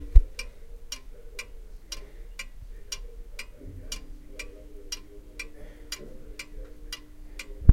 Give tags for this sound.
clock home radio tic-tac

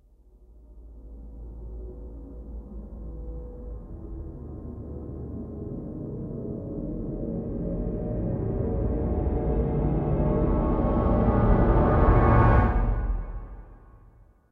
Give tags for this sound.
bass-clarinet crescendo transformation

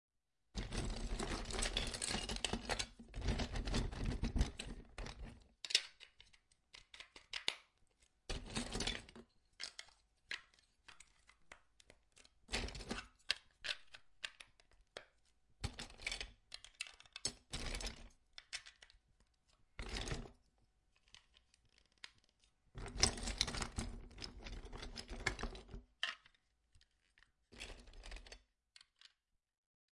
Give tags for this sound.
czech cz panska lego

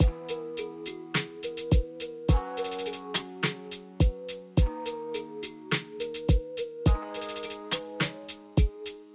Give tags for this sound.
drum-loop rhythm percussion-loop quantized percs groovy beats

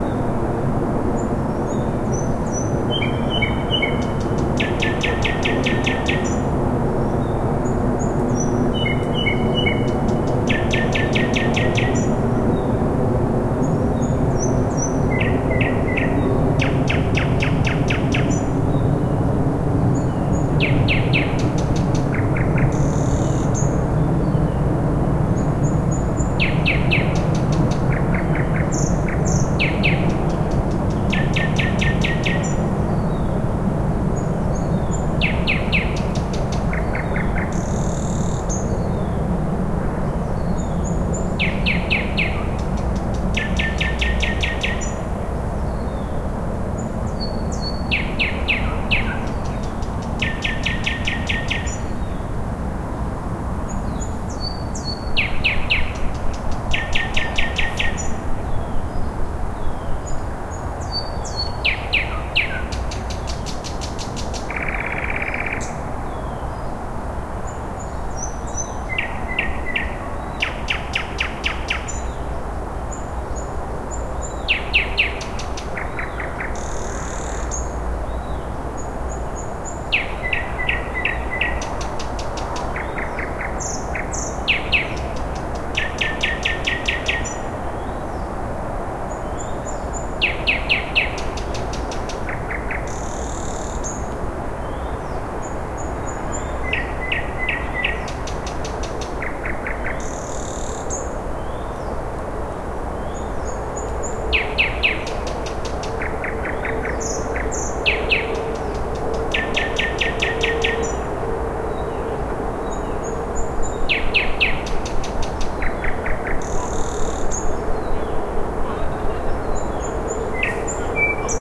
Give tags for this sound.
bird birds birdsong field-recording nature nightingale

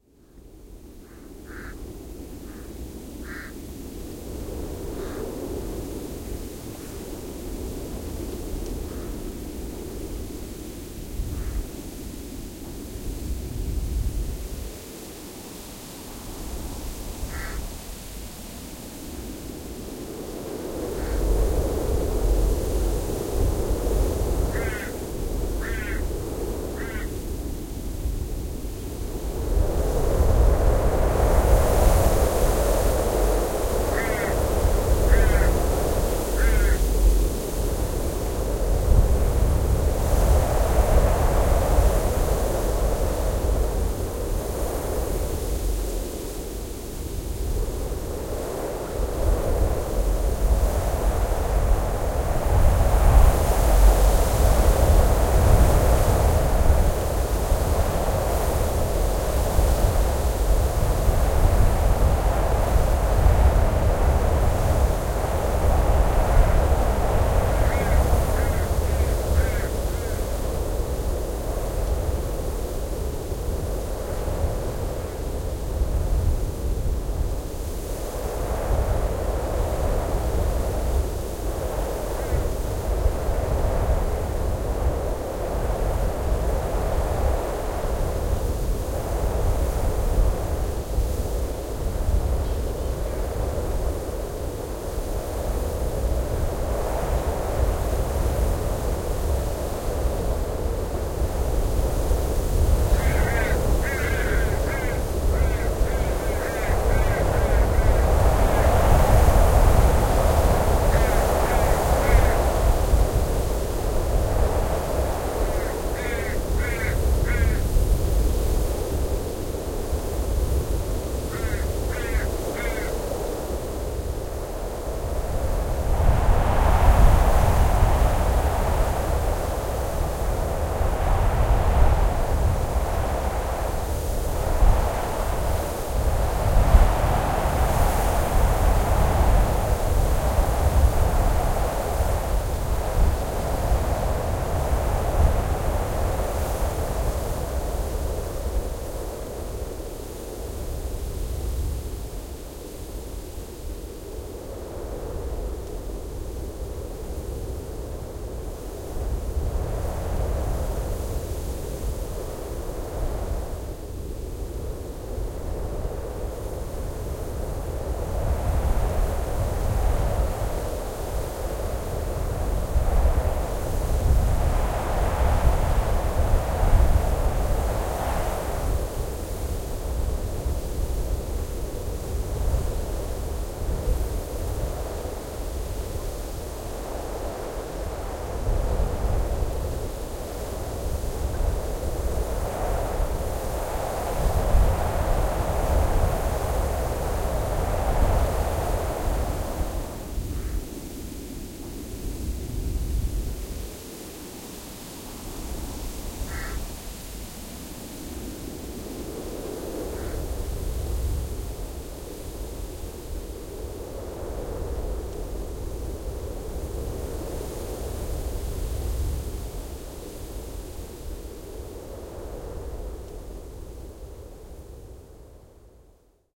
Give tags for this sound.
ambiance ambience ambient atmos atmosphere crow desolate field-recording nature raven soundscape trees weather wind windy

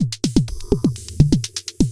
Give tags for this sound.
drumloop electro 125-bpm

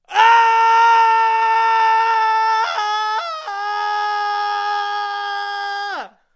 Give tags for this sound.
yell scared vocal

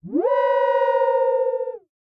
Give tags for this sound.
engine Free Sound-Effect creature Film 8bit Video-Game Synth beast animal FX Movie howl

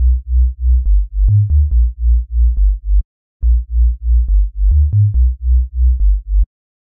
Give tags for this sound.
140 2step 320 bass dubstep future-garage wobble

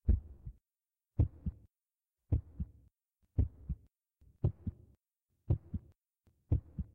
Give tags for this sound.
scary dark gamedev sfx beats realistic horror heart

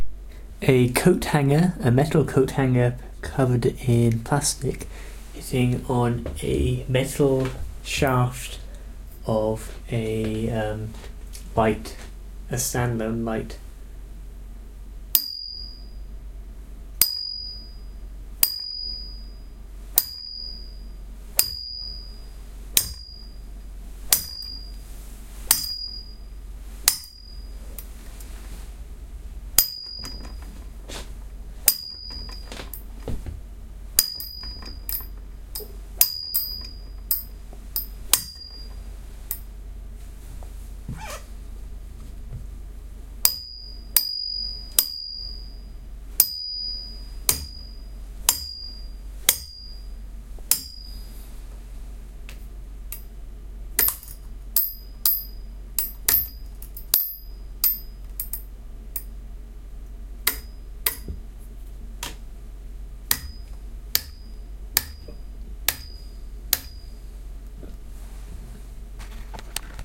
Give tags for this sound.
clang metal ping

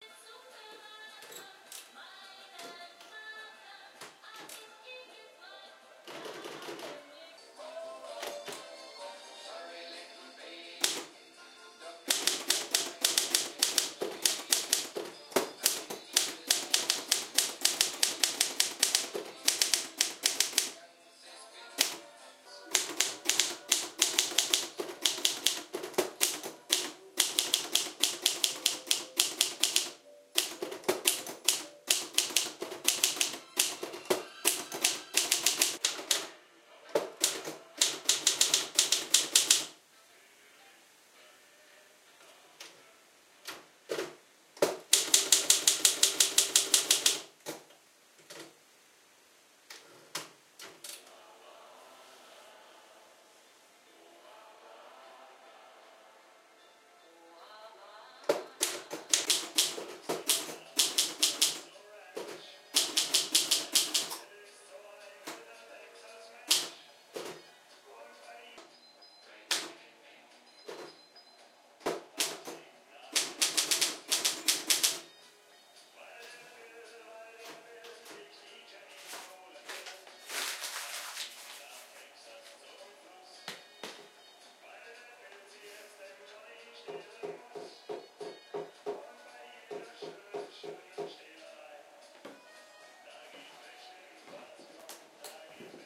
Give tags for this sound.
1956 Olympia Schreibmaschine Tippen type typewriter typing writer

